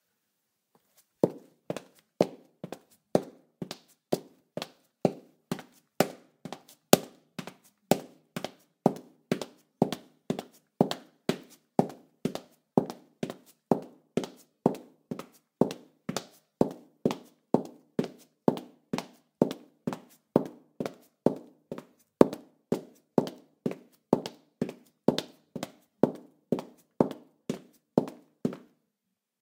01-16 Footsteps, Tile, Female Heels, Medium Pace
Female in heels walking on tile